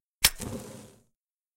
Vintage lighter with ignition SFX
Just a simple vintage lighter sfxwith ignition sounds at the end.
Ignition, Vintage, Lighter